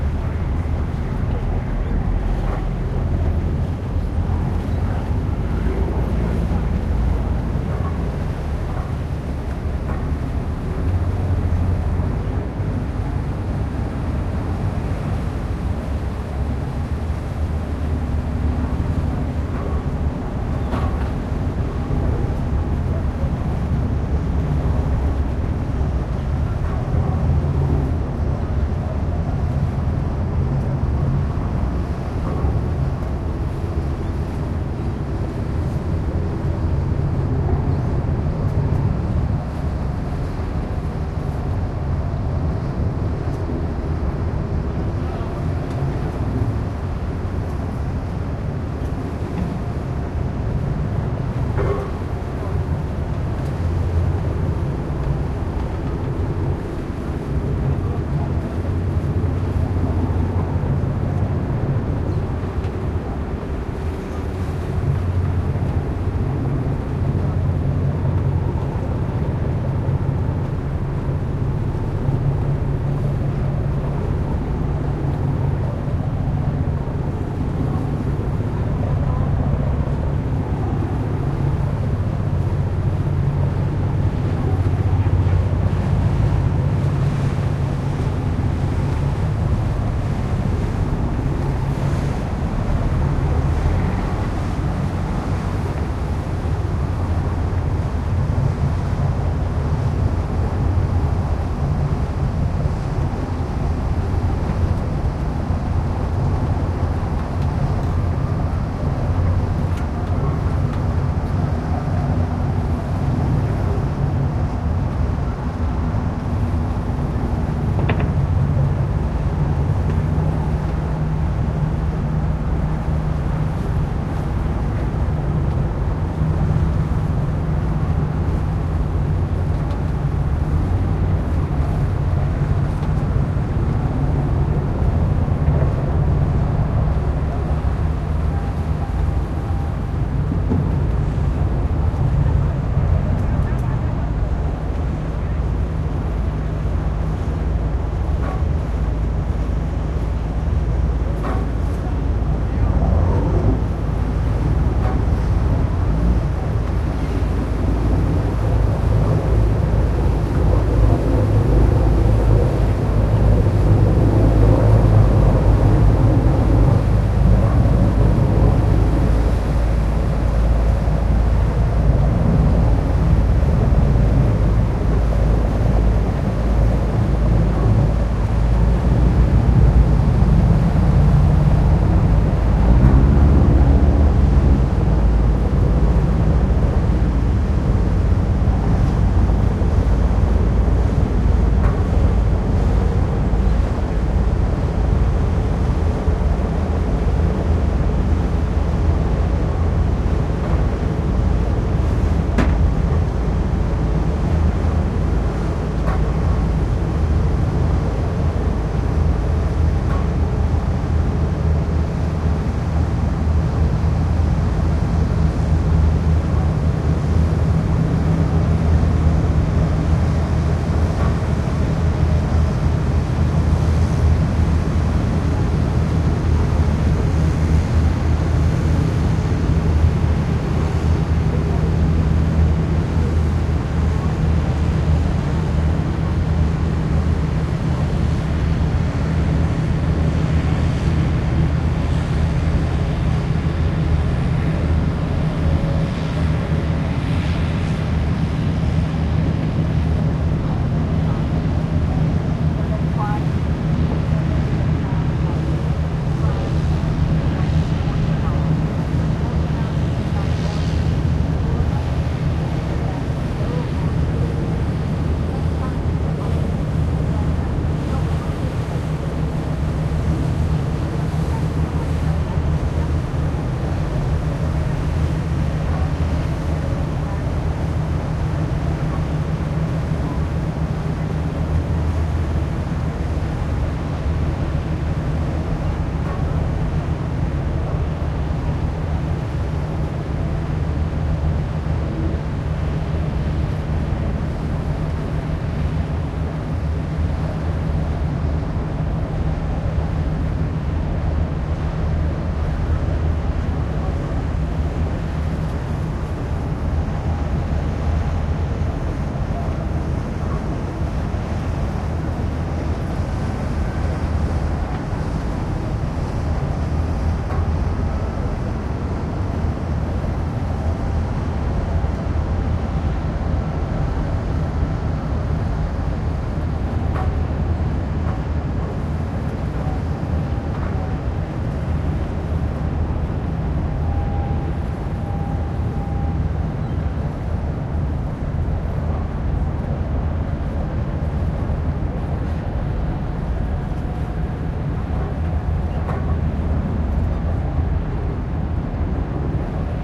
111011 - Venedig - Hafenatmosphaere 2
field recording from the 5th floor of the Hilton Venice hotel in direction of Venice central